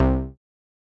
Synth Bass 014
A collection of Samples, sampled from the Nord Lead.
bass, lead, nord, synth